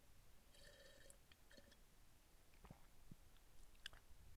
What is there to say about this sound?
Taking a few drinks of water and lightly gulping it down.